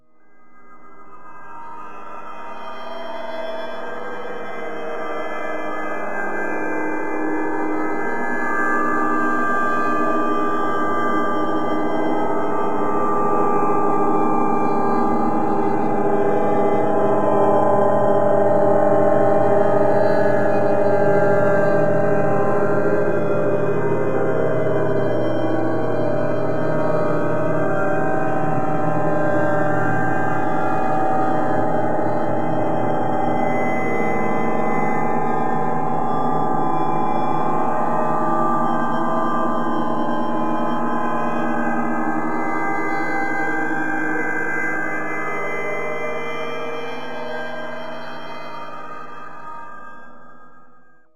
clarh tstch new S 04 2001spaceodessy!!!!!!!
A friend was travelling, stayed over, and brought a battered clarinet (they play saxophone usually)- I sampled, separated a few overtones, and put them back together.
chord, clarinette, melody, processed